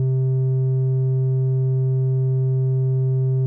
TX81z wave2
A raw single oscillator tone from a Yamaha TX81z. Sort of a sine/triangle wave mix.
digital, loop, sample, synth, tone, tx81z, wave, raw, yamaha, synthesis, electronic